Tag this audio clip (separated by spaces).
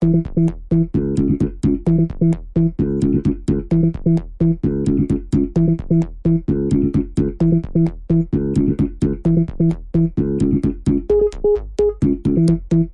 music-loops free-music-to-use vlogger-music sbt vlogging-music free-music vlog syntheticbiocybertechnology loops prism download-music download-background-music download-free-music music-for-vlog free-music-download free-vlogging-music music-for-videos electronic-music music audio-library background-music vlog-music